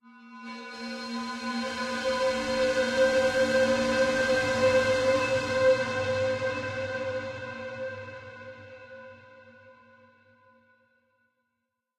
Long "sting" describing destroyed and abandoned area, location, city, buildings ruins. Inspired by abandoned theme in rather wide scope.
I made this by tuning a sound in a sophisticated Reaktor Instrument and applying custom Stretch Curve in Paulstretch after.